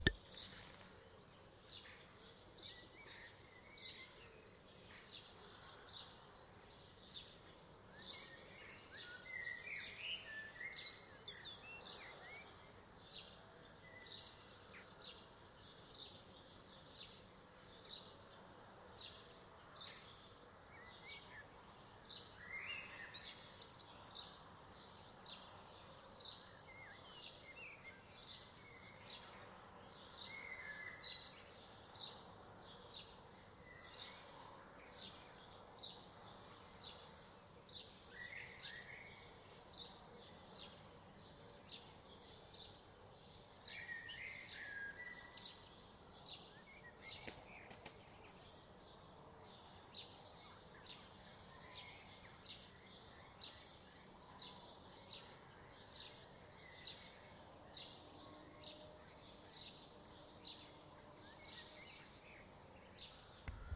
Field recording of birds singing in the early morning in residential area.
Recorded with Zoom H1 in the dutch village Stiens